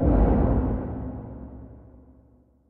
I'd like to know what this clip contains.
FM weapon sound
missile, gun